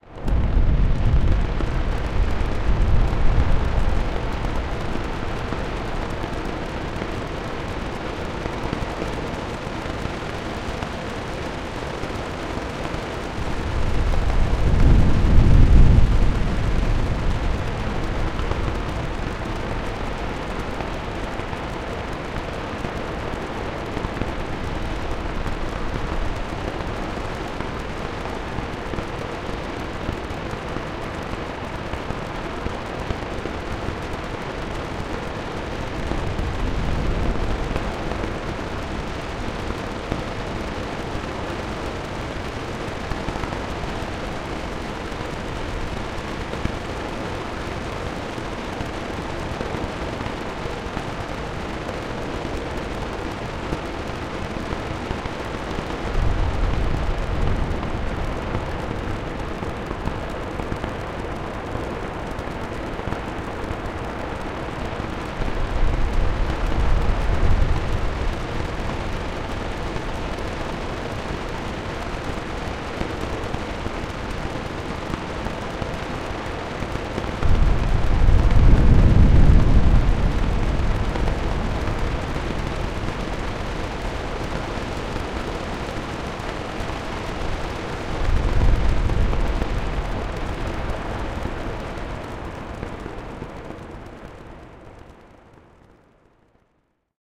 Generated Rain & Thunder
This sound was generated from pink noise only, using granular synthesis, filters, envelope shapers, delay and reverb.
thunder, wind, pink, ambience, granular, rain, noise